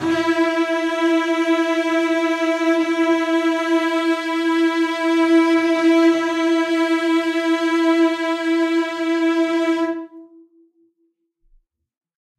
One-shot from Versilian Studios Chamber Orchestra 2: Community Edition sampling project.
Instrument family: Strings
Instrument: Cello Section
Articulation: vibrato sustain
Note: E4
Midi note: 64
Midi velocity (center): 95
Microphone: 2x Rode NT1-A spaced pair, 1 Royer R-101.
Performer: Cristobal Cruz-Garcia, Addy Harris, Parker Ousley
cello, cello-section, e4, midi-note-64, midi-velocity-95, multisample, single-note, strings, vibrato-sustain, vsco-2